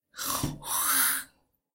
disgusting troat sound
the sound of a sick troat trying to expulse something
flu, ill